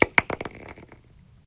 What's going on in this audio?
Ice Hit 7
break, crack, foley, ice, ice-crack, melt